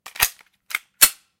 The Sound of a toy gun reloading and cocking.
gun, weapon